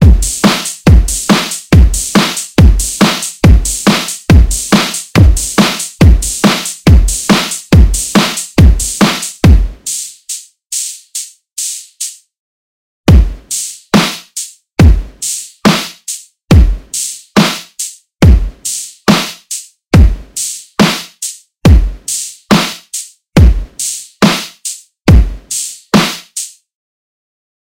Drum Mix
First part is a house beat. Second part is more of a dubstep beat.